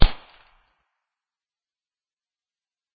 Snapping sticks and branches
Digital Recorder